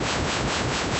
300px-VariableFrequency
More coagula sounds from images edited in mspaint.
ambient, waveform, synth, space